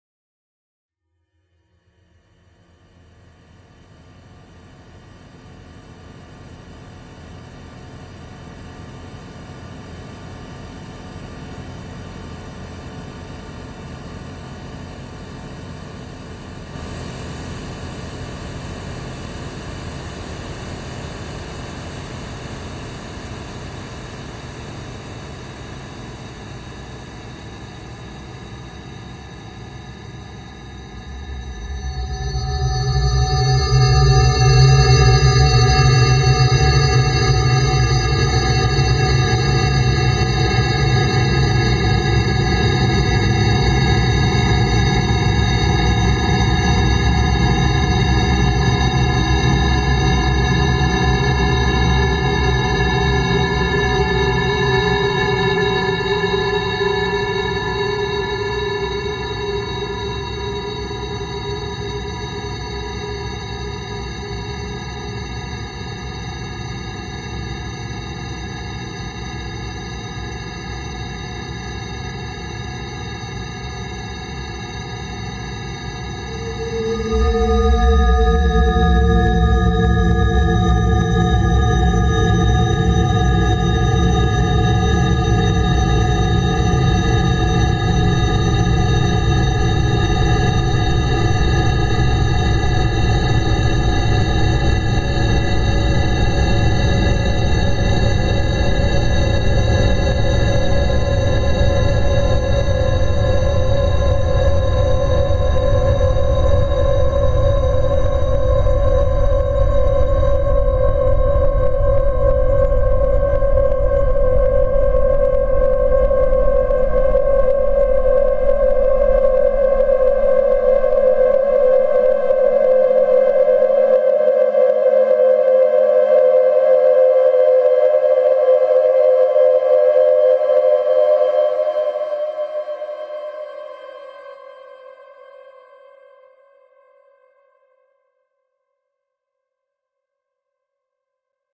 This is a handmade single cycle waveshape processed with granular synthesis. It gets kind of loud, so be gentle to your ears!
atonal, digital, drone, experimental, noise, sound-design